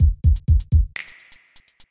4 bar loop
4 loops taken from a single On Road project, each loop is 4 bars long, at 125bpm.
Sounds closet to Hip Hop.